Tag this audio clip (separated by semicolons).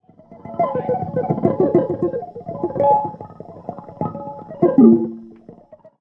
noise; weird; guitar